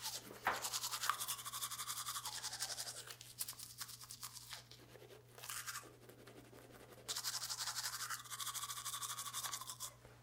Brushing Teeth
class, sound, intermediate